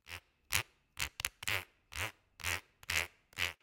This sound was created by running a pencil up and down the spine of a spiral notebook. Using various speeds helped me create different types of sound. This sound has been cut and faded but no other effects have been added.